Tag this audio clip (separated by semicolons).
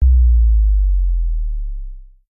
Bass; Bomb; Boom; Explosion; Low